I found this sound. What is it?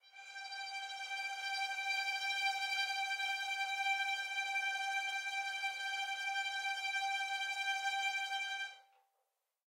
One-shot from Versilian Studios Chamber Orchestra 2: Community Edition sampling project.
Instrument family: Strings
Instrument: Violin Section
Articulation: tremolo
Note: F#5
Midi note: 79
Midi velocity (center): 31
Microphone: 2x Rode NT1-A spaced pair, Royer R-101 close
Performer: Lily Lyons, Meitar Forkosh, Brendan Klippel, Sadie Currey, Rosy Timms
midi-note-79, violin, tremolo, fsharp5, single-note, violin-section, multisample, midi-velocity-31, strings, vsco-2